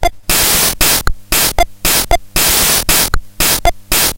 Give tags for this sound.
80s beguine casio drumloop loop pt1 retro

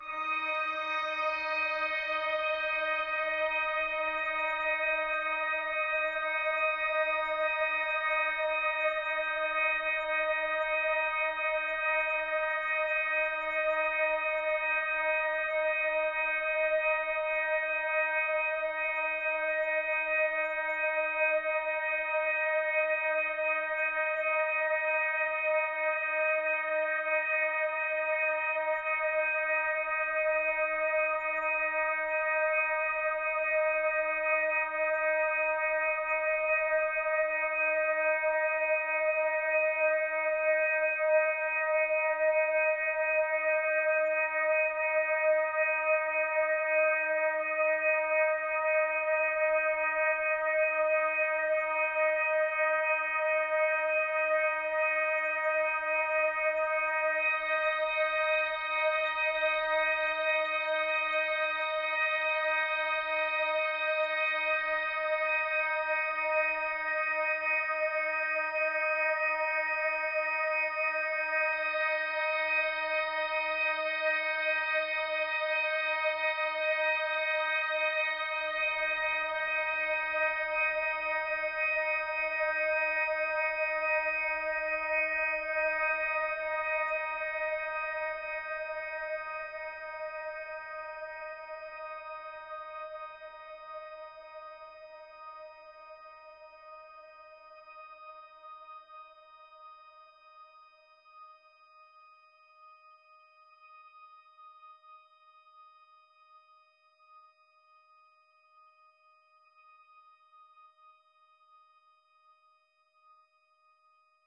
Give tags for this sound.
overtones drone multisample ambient

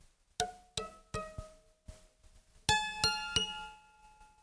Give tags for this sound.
Guitarra Audio UNAD

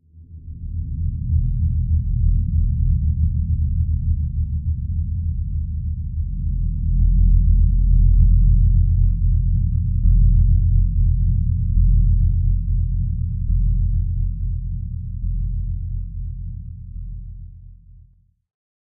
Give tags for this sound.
ambience music

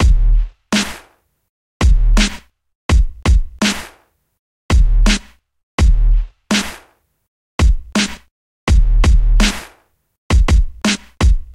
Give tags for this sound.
massive 83bpm loop hip-hop bass slow hiphop rap beat base

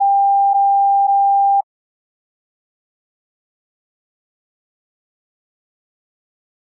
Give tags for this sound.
beep bleep boop digital electronic headphones headset wireless

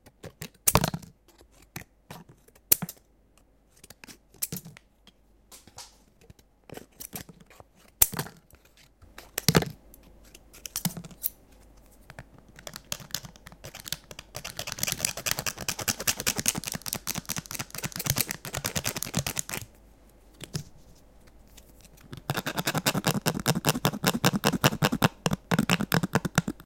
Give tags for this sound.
plastic cutting slicing card scrape credit-card

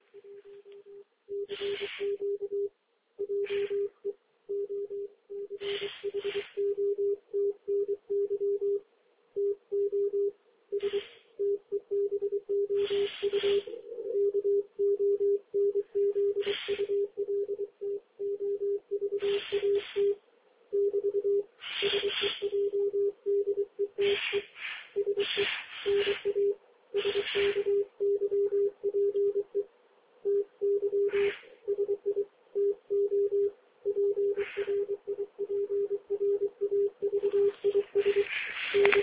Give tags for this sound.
cw jammed-radio-receive jay-voice morse morse-code radioamateur-communication

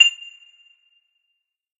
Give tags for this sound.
synthesised chime metallic short one-shot

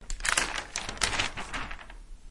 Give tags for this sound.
newspaper page paper read turn

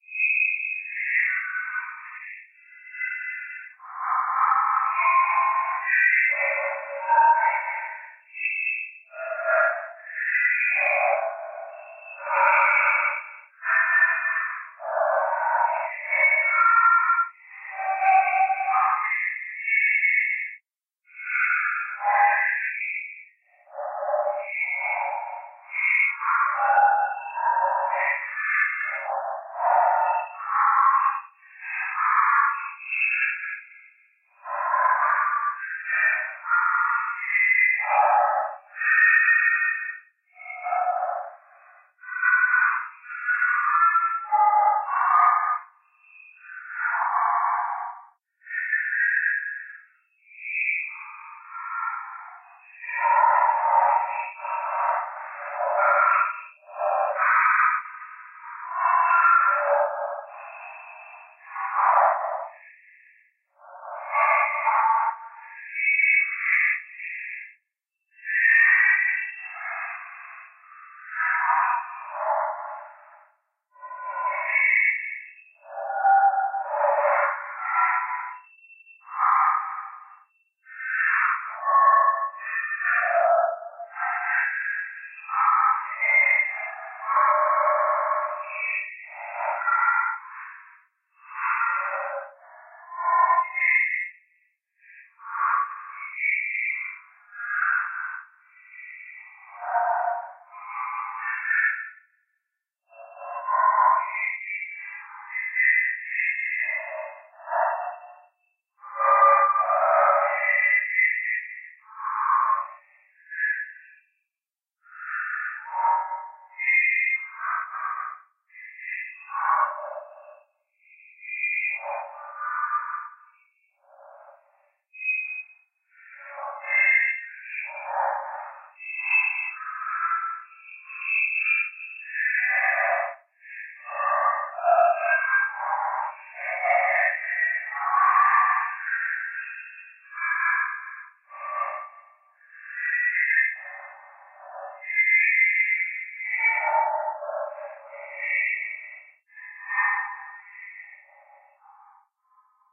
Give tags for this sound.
Alien Animals Fiction FX Game-Creation Outer Outer-Space Scary Science Sci-Fi SciFi Space Spaceship Spooky Strange

